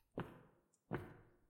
Footsteps Cave 01
Walking in a cave or a temple or just in a room with and echo
echo, feet, footsteps, lima, steps, walk, walking